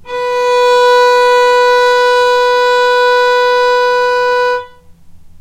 violin arco non vib B3
violin arco non vibrato
non, arco, violin, vibrato